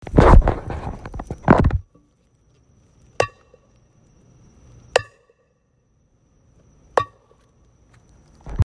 Three Pot Smacks
Bash, Smash